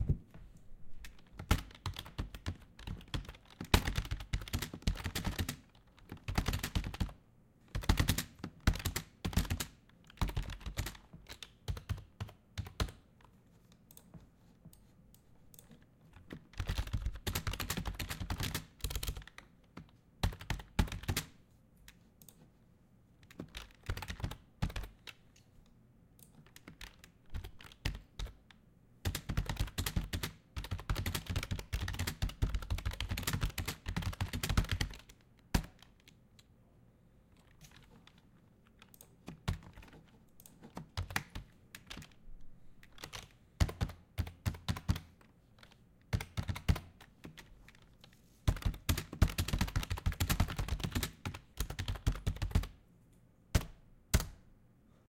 One person typing away on a keyboard and clicking the mouse. Probably doing accounts or something...